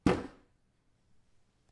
Bells on my front door, closing the door, and me hitting various objects in the kitchen.